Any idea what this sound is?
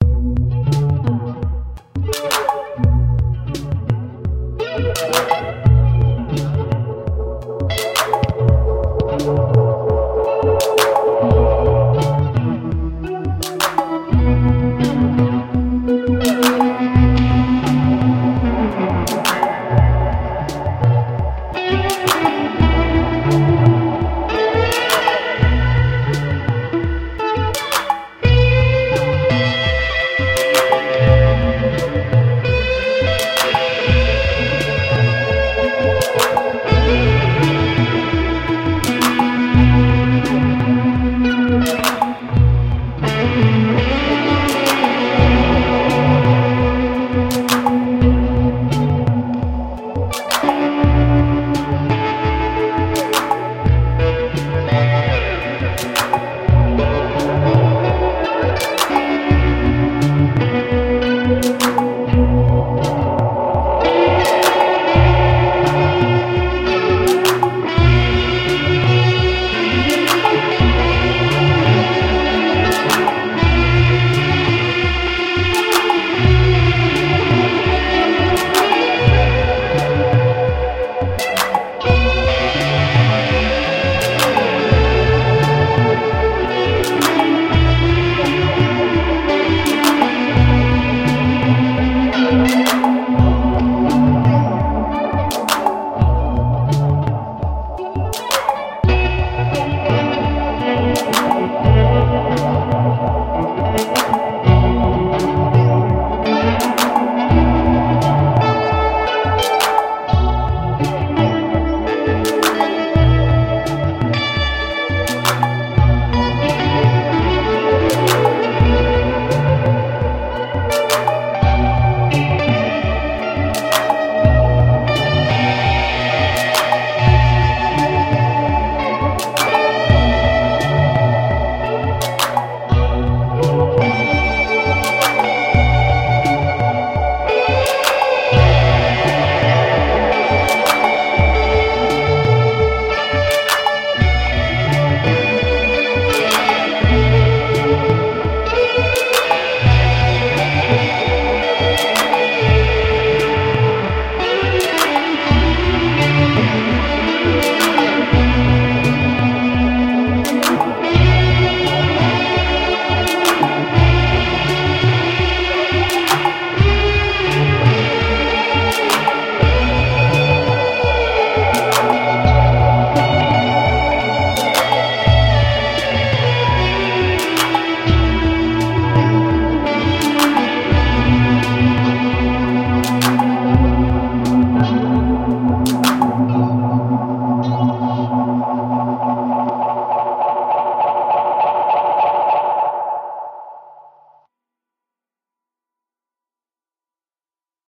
Experimental Guitar and drum machine
this song is easy guitar composition in Bm tonality, where I first experimenting with drum-machine.
echo, drums, lo-fi, atmospheric, reverberation, experimental, distortion, song, music, rock, guitar, ambient, drum-machine, elecric-guitar, electronic, solo, psychedelic, noise, delay, Bm-chord